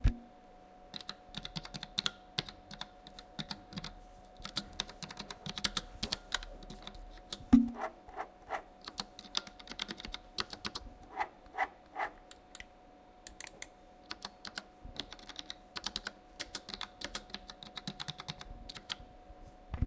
someone typing with a keyboard
esmuc, keyboard